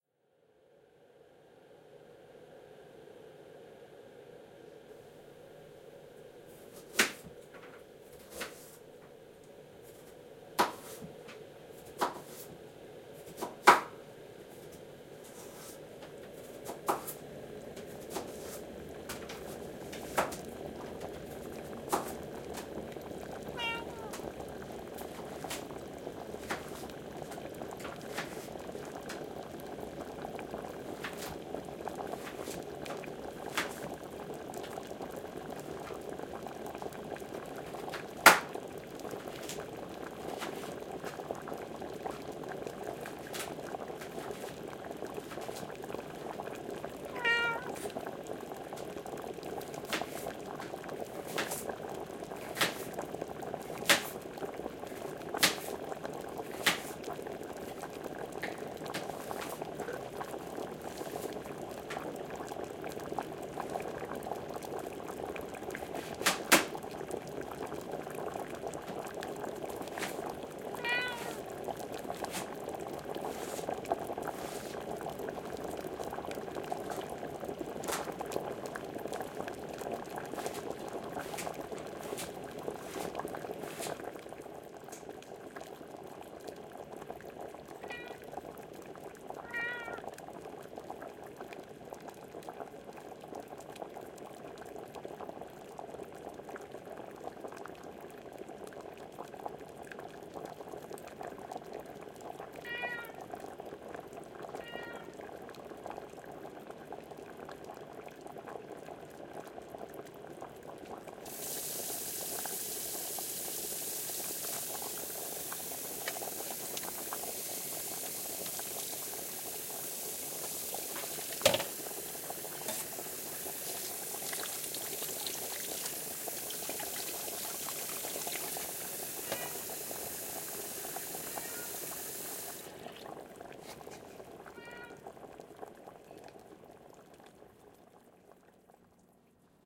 Kitchen Ambiance Sound
Ambiance of boiling water, chopping, washing of cutting board, and meows.